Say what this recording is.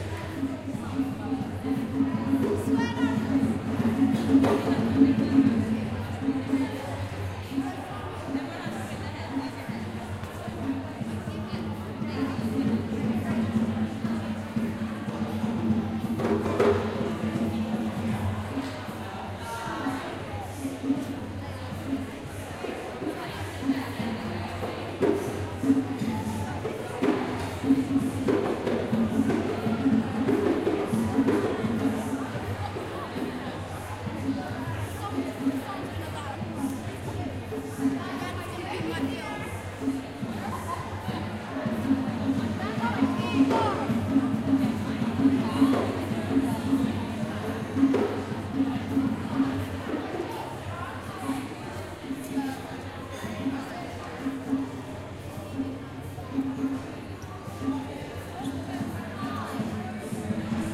Large hall with crowd and bongos
Recorded at an event in Bristol UK City Hall 2014. Interior, crowd of young people and adults talking and laughing, bongos being played in the background. No individual voices or conversations audible.
Recorded on H4N Zoom, no post production.